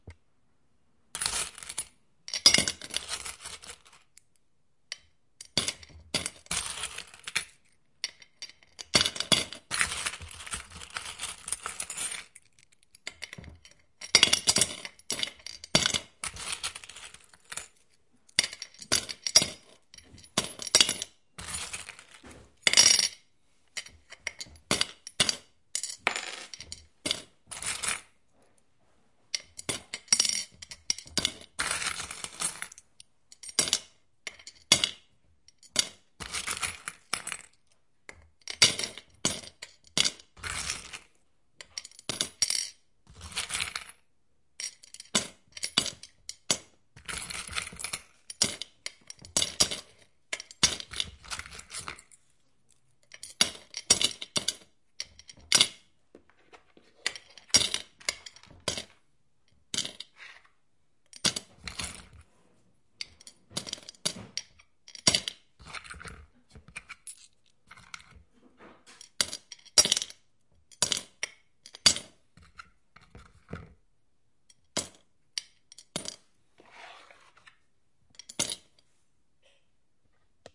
saving the pennies
Close-miked recording of coins being slotted into a piggy bank. Zoom H4n, internal mics @ 120°
foley, h4n